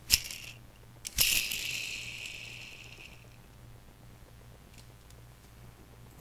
1977matchboxno60holdenpickup
Me spinning the wheels of a vintage 1977 Matchbox #60 "Holden Pickup" (looks like a Chevy El Camino to me) without the missing yellow motor cycle attachment that slid into the bed.
car, matchbox, spin, unedited, wheel